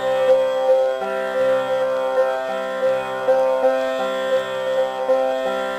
Me playing on tanpura (an Indian instrument), sometimes also called a tambura. It's tuned to C (either major or minor as it's first and fifth).